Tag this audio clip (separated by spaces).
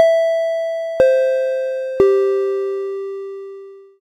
bell chime ding microphone pa ping ring